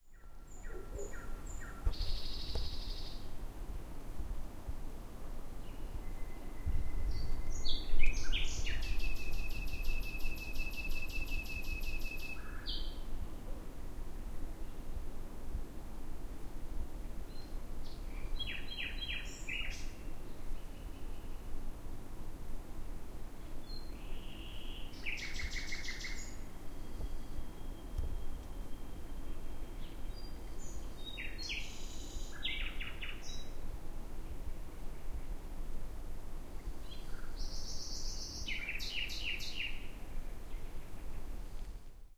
birds night stk
pelion greece "field recording" forest birds g